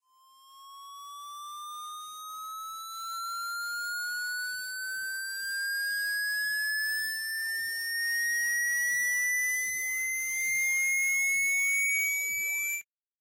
buildup sine high
High-pitched sine buildup/rise made in Audacity with various effects applied. From a few years ago.
High, Sine, Rise